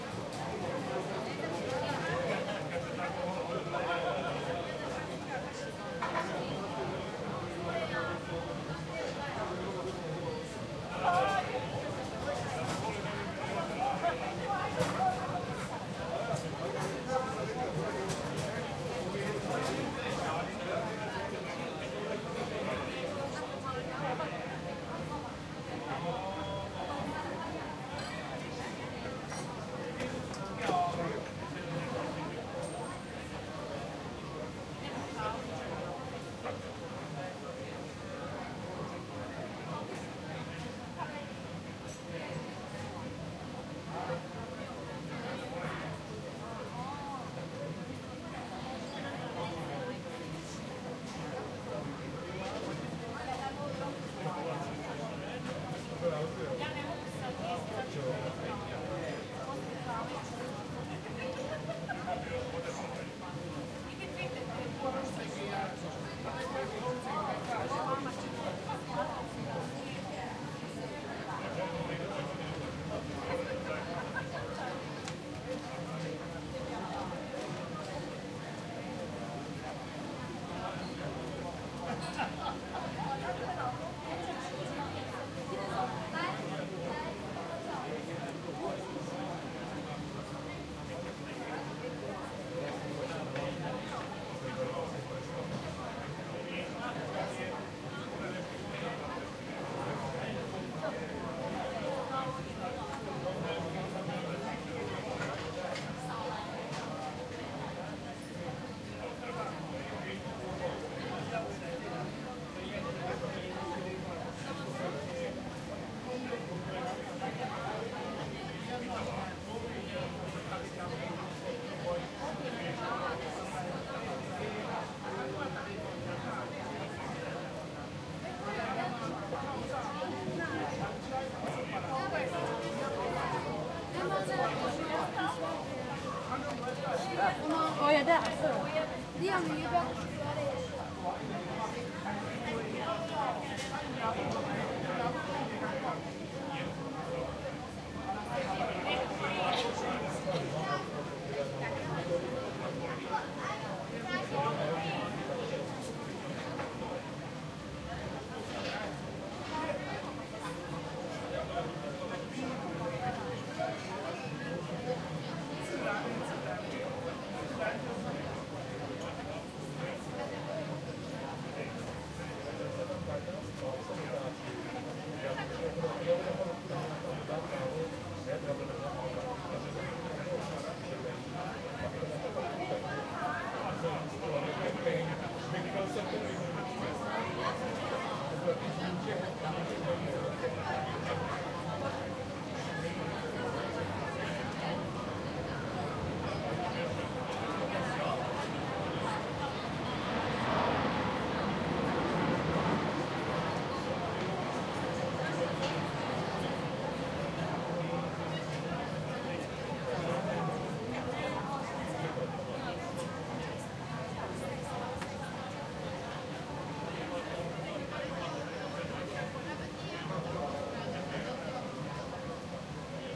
130720 Trogir 17Gradska 4824
Stereo recording of a crowded café on a small square in the center of the Croatian town of Trogir.
Ther recorder is situated in an enclosed alcove in a wall, facing the café and the tables.
It is a quiet summer evening, lots of tourists from all over the world are sitting at the tables.
Recorded with a Zoom H2 with a mic-dispersion of 90°